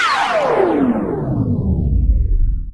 digital canvas fall jingle component
canvas, component, digital, fall, jingle